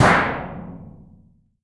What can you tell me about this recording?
Plat mŽtallique gong ff crt 1